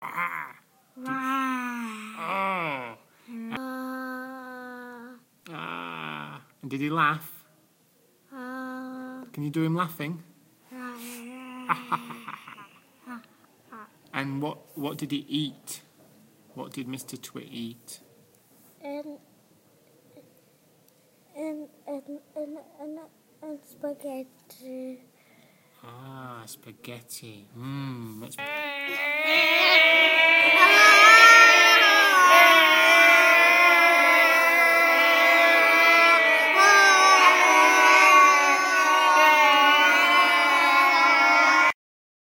MySounds GWAEtoy Pool
recording, field, TCR